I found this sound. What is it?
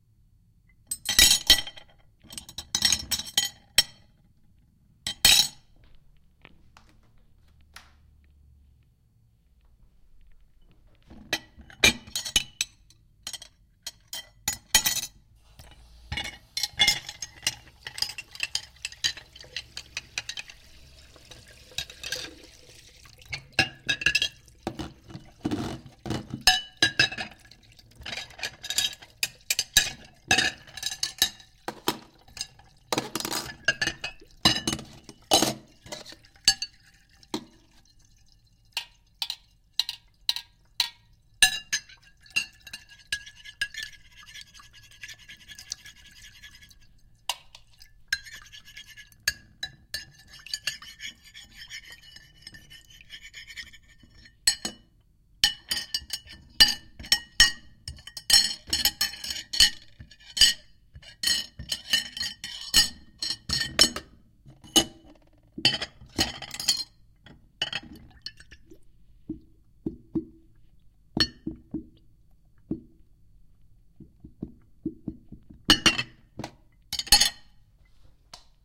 Dishes I moved around in the sink and against eachother. Some water and wet sounds too.
Recorded with Sony TCD D10 PRO II & Sennheiser MD21U.